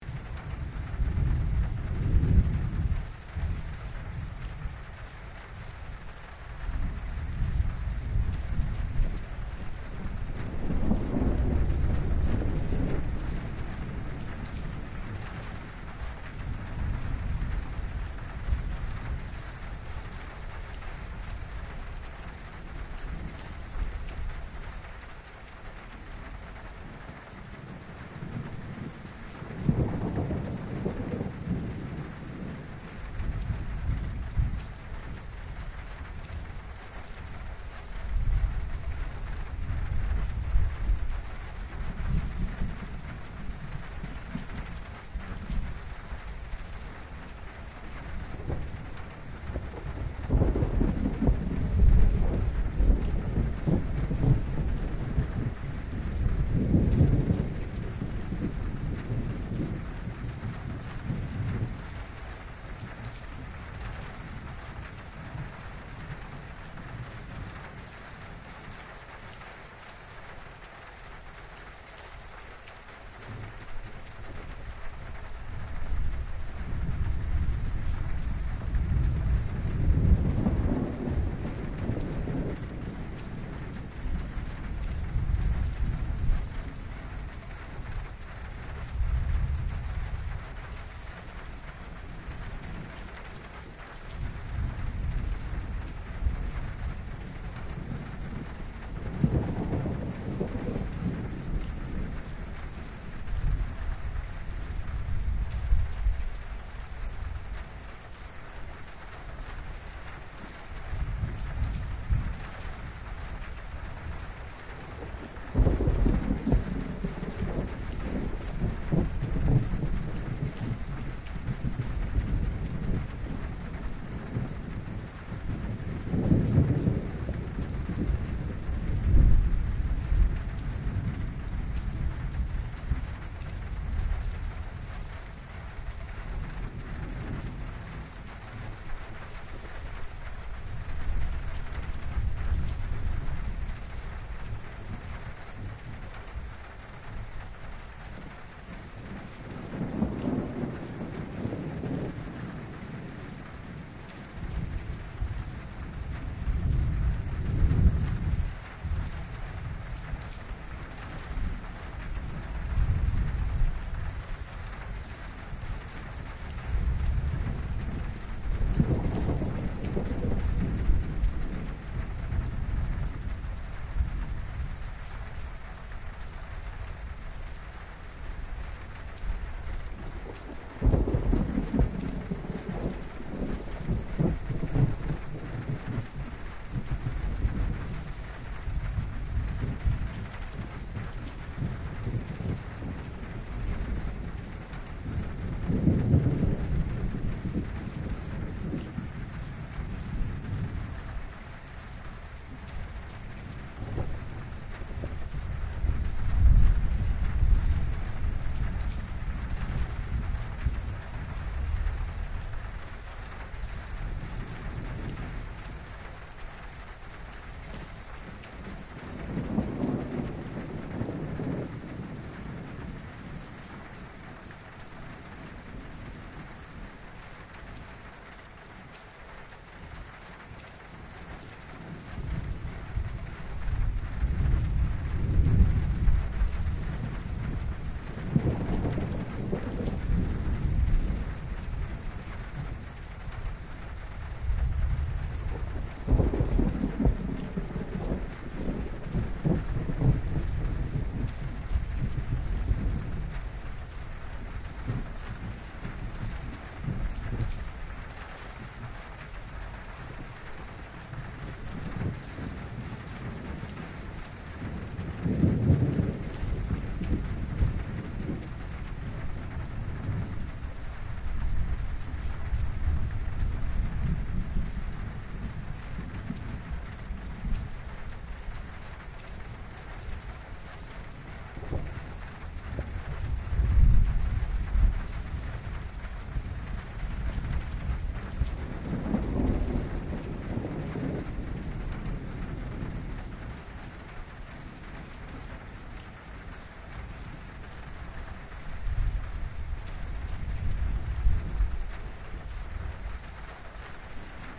Rain on metal roof with distant thunder
Relaxing rain sound on metal roof with distant thunder ambience.
nature, lightning, thunderstorm, rain, storm, field-recording, raining, thunder, thunder-storm, weather